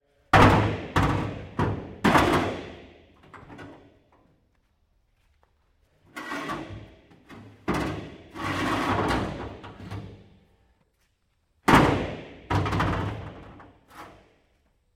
Shovel onto Flat Bed Truck Tray Ute
Loading a metallic shovel onto the back of a pickup truck or ute.
car, close, flat-bed, foley, hardware, load, metal, packing, pickup, prepare, shed, shovel, slam, tool, toolbox, trade, tray, truck, ute, work, workshop